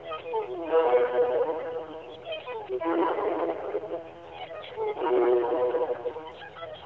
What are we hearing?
Orchestral Phone Message 12
recorded on a phone, mysteriously, as a message on my answering machine. I have no knowledge as to who recorded it, where it was recorded, or whether it came from a live performance or not. All of the segments of this set combine sequentially, to form the full phone message.
glitch, phone, cello, message, recording, orchestral, bass, bad, viola, cheap, violin, glitchy, dirty